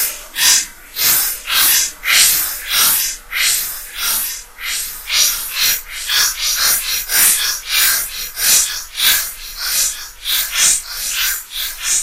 Mysterious birds
These sound like real birds but they are actually pure electronic sound, no sound of mine is non-electronic.
Birds, Crows, TrumpFree